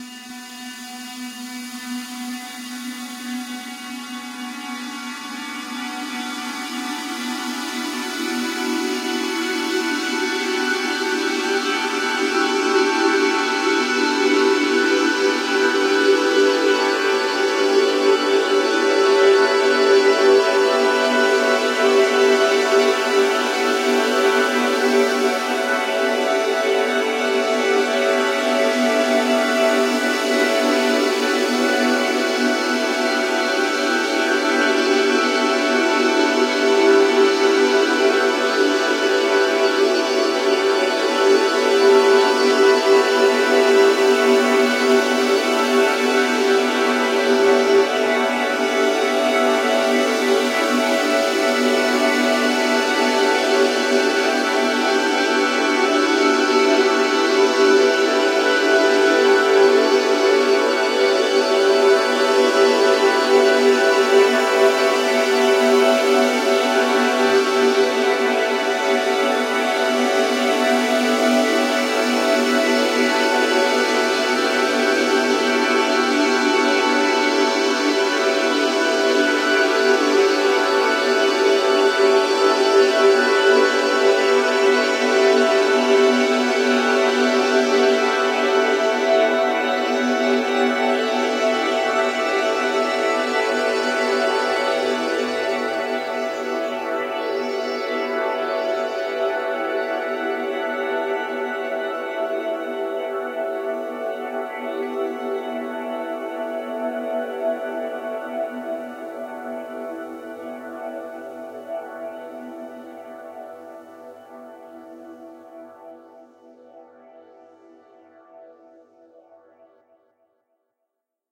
A dulcimer sample ,processed with Audacity and Magix.